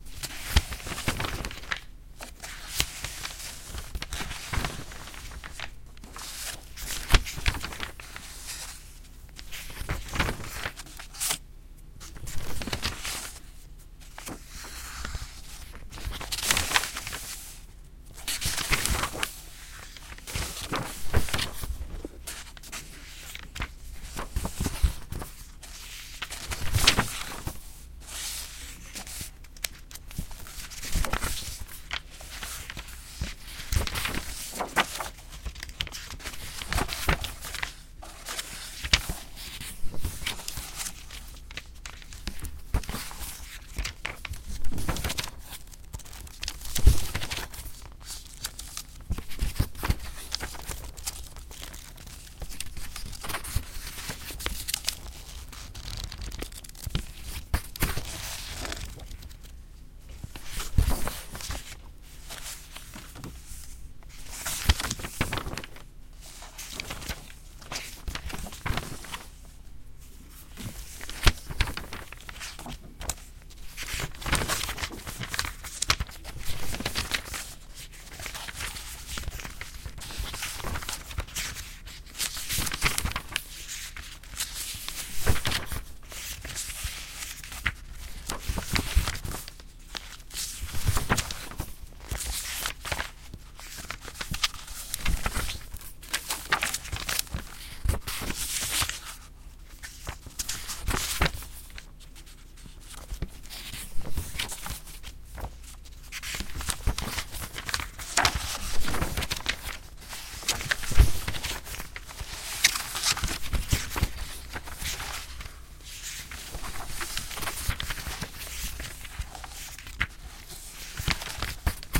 This is a mix of 3 different people turning book pages, like say in a library.
multiple, page, pages, turning